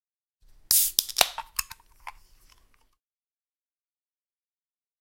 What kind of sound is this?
Opening a can
opening a soda can